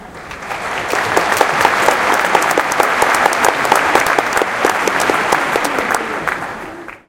This recording was taken during a performance at the Colorado Symphony on January 28th (2017). Check out the other sounds of this nature in the "Applause" pack - there's lot's more of many varieties to see! Recorded with a black Sony IC voice recorder.